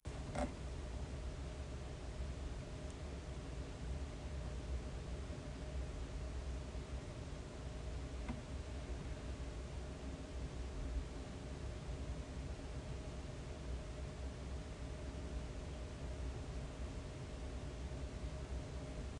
Ben Champion 001 EmptyDormRoom
Empty Dorm Room
Empty, Room